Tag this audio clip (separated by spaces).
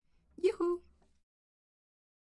Voice Woman